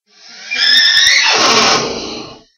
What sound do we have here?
Here is a sound created by my uncles battery powered drill while he was remodeling our kitchen. Also don't forget to checkout all of the sounds in the pack.